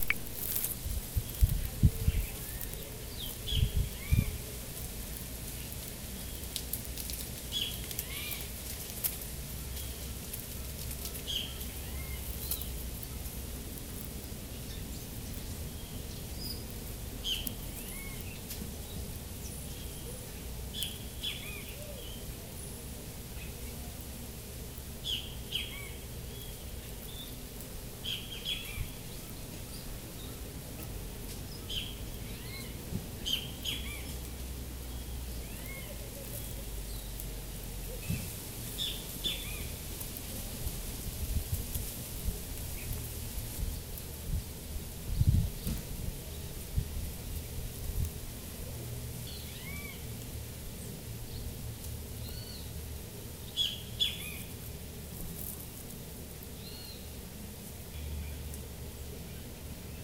Jardim (garden)
Gravado nos jardins grande hotel de Araxá, MG. (Tauã grande hotel)
Gravado com celular Samsung Galaxy usando o App "Tape Machine Lite".
(Recorded with Samsung Galaxy using "Tape Machine" App for Android)
16 bit
Mono
262, agua, araxa, barreiro, flores, flowers, garden, grande-hotel, jardim, lagoa, nature, passaros, pond, taua-hotel, triangulo-mineiro